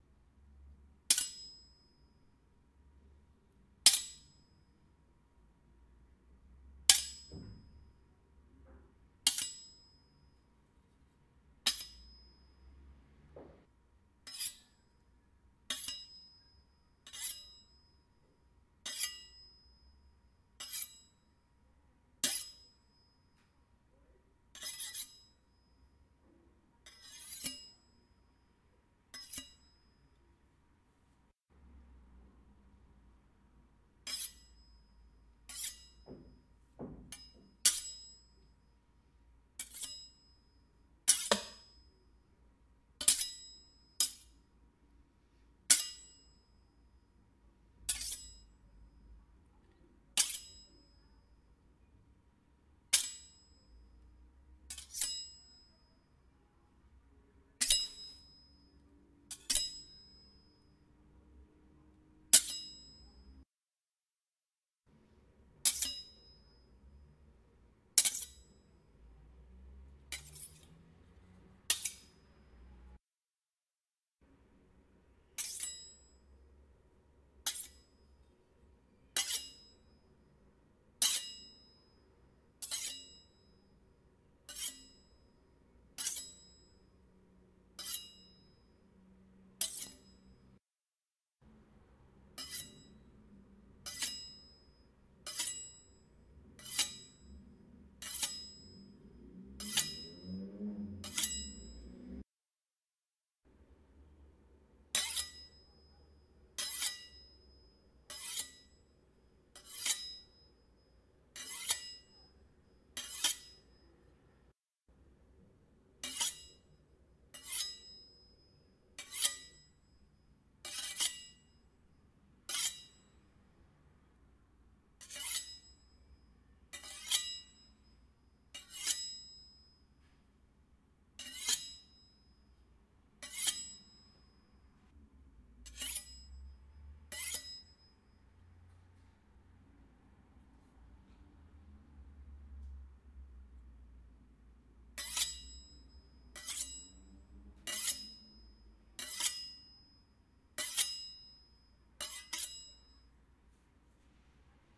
Knife Sharpening
Recorded in home studio with Focusrite CM25. Sounds made using two knives.
blade, knife, metal, sharpening, steel, swing